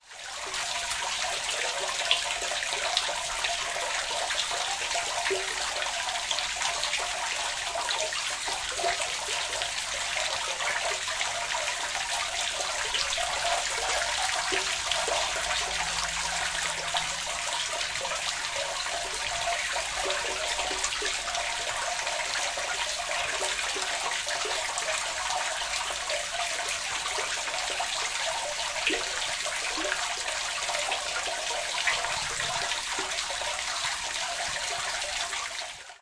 Rain in Sewer Drain
Just a simple recording of some water flowing through a sewer drain. Some nice running water SFX that could be used as a positional sewer loop in a game, or as part of ambient bed.